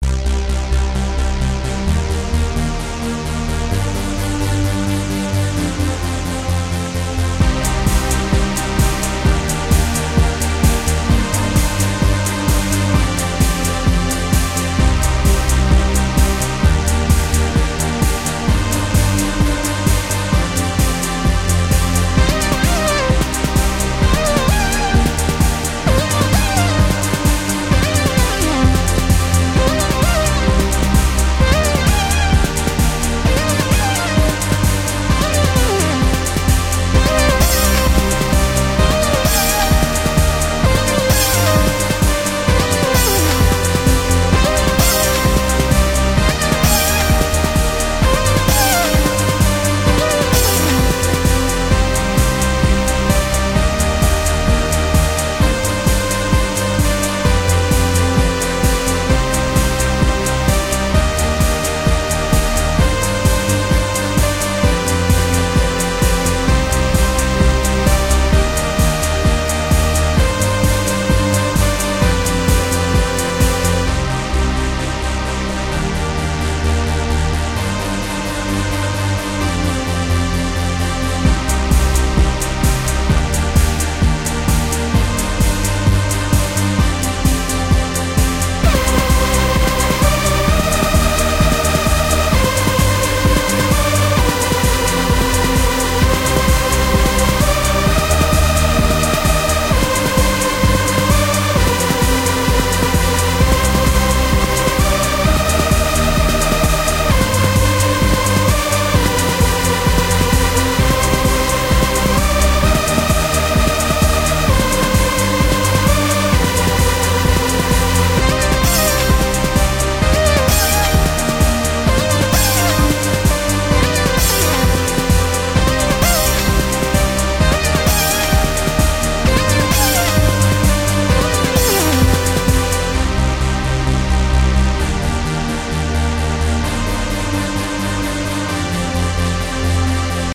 Melody written in FL Studio. (First part)
dark, electronic, pad, space, space-pad, synth